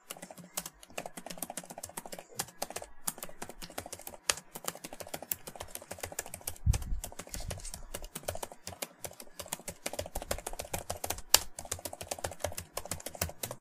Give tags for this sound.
toshiba
computer
typing
keyboard

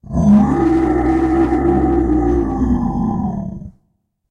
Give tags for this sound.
creature
growl
grunt
monster
roar

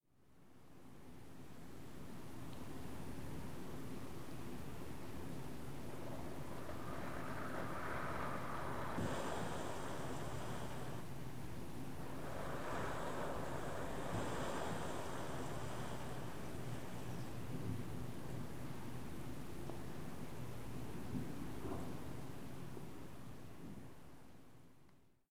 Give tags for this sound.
Free
Background
Ambient

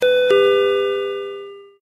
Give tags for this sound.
Ring
Ding-Dong
Door-Bell
Ding
Store-Bell
Convenience-Store
Door-Ring
Door-Ding-Dong
Store-Ding
Store

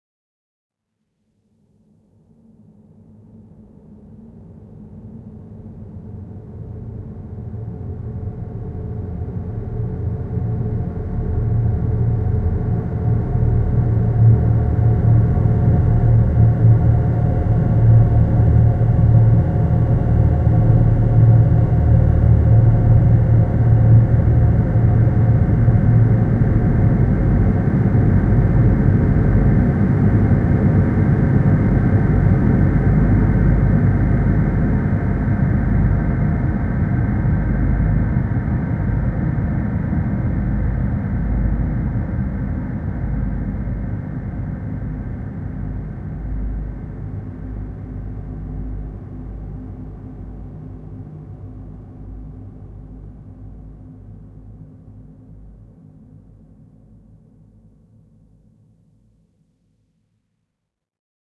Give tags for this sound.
Electronic
Ambient

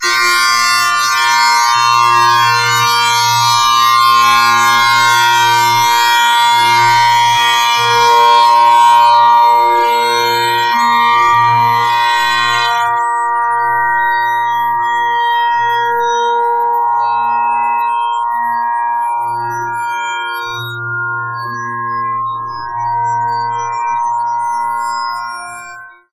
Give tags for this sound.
guitar
processed

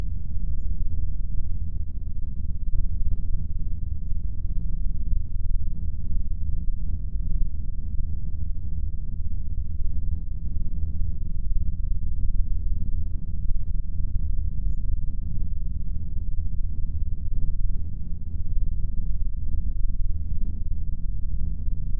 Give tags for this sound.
ambiance
ambience
background
dread
horror
hum
loop
tension

